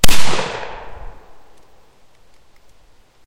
Mossberg 500A - 1 shot and echo alt
Firing a Mossberg 500A in a woodland environment, 7 1/2 load.
Recored stereo with a TASCAM DR-07 MkII.
Here's a video.
12, 12g, fire, firearm, firearms, firing, gauge, gun, gun-shot, mossberg-500a, outdoor, pump-action, shell, shoot, shot, shotgun